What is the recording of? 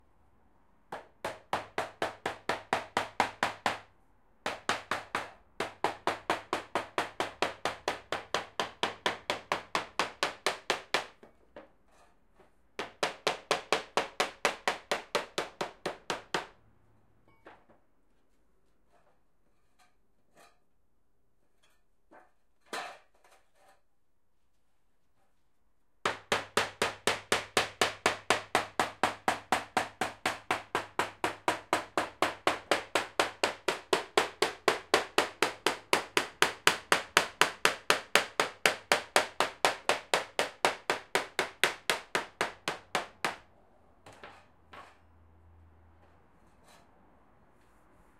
metalworking.hammer 2
hammer noise rumble metal metalworking knock rap clatter thumb tap repair construction builder elector
tap, rap, clatter, hammer, thumb, elector, repair, knock, construction, noise, builder, metal, rumble, metalworking